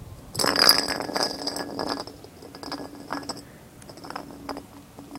Rolling Bottle 02
Sounds made by rolling a small glass bottle across concrete.
bottle, glass, roll